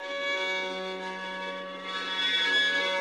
me playing a note, badly, on a violin, recorded on an AKG D-65 into an Akai S2000 sampler around 1995